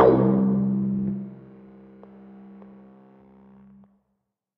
Sample07 (acid-B- 8)
Acid one-shot created by remixing the sounds of
tb, one-shot, 303, synth, acid